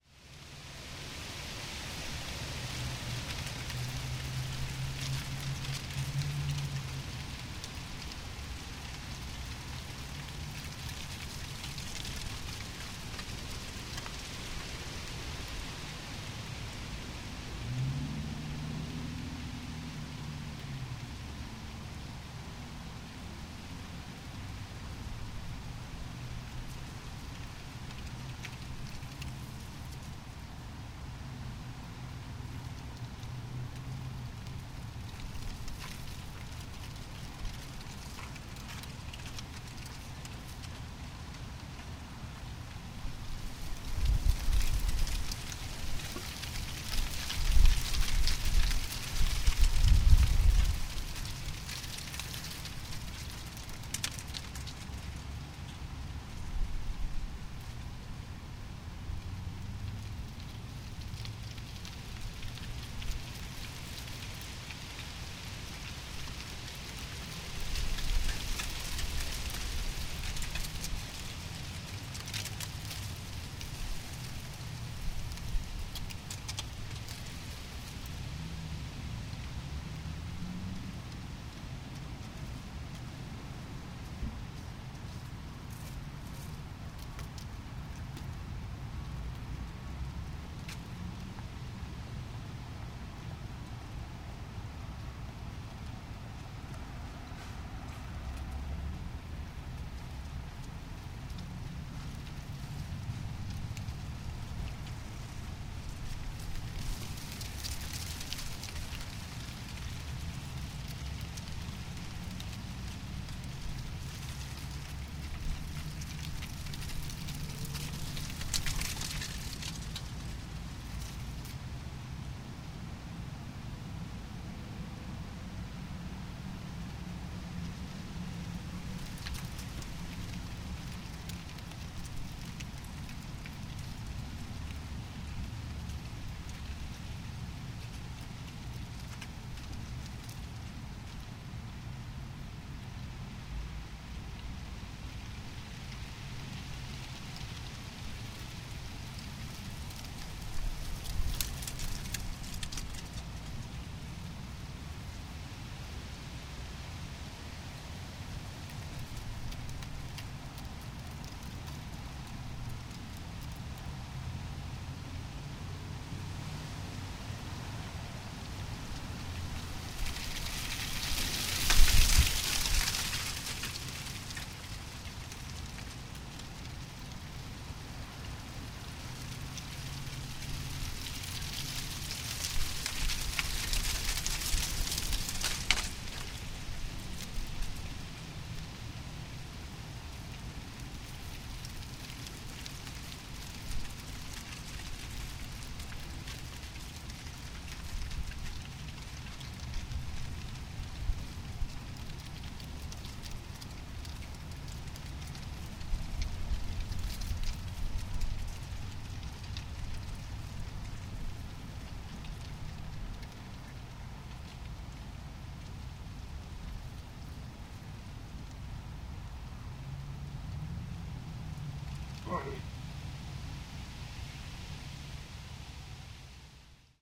WindblownLeavesSkitteringAcrossAsphaltOct31st2014
A recording of dry leaves skittering across an asphalt parking lot around 2:00PM on a Friday afternoon. I feel this recording captures the serene, but sad, sound of dry leaves in the fall being scattered about by a strong North wind.
Recording made with the Marantz PMD661-Oade, and the incredible Beyerdynamic ME58 microphone. Since this particular microphone is a dynamic microphone, I had to crank my record gain up to 7. I was really happily surprised that the sometimes un-listenable wind-roar and wind rumble is barely noticeable on this recording......
Emjoy this Autumn Soundscape.
autumn, field-recording, gust, gusts, leaves, trees, wind, windy